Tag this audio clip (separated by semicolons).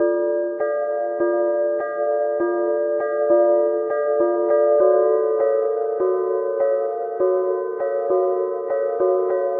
adventure; creepy; dark; drama; fear; ghost; horror; loop; mysterious; mystery; nightmare; scary; sinister; spooky; suspense; terrifying; terror; thrill